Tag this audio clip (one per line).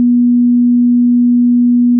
tone
looping
sine-wave
hearing-test